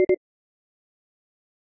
2 beeps. Model 1